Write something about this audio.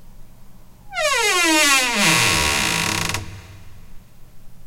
door squeak 01
A wooden room door opening without slam.